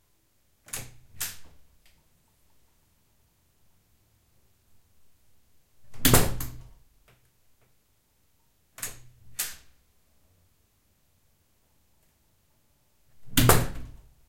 heavy metal door
Heavy basement door for fire protection is opened and closed twice. Tascam DR-07 mk2
Heavy, Metal, Close, Door, Shut, Open